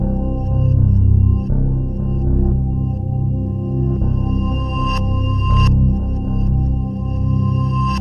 mantra kind of sample, reversed chimes